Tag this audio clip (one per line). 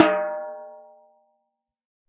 1-shot
drum
multisample
tom
velocity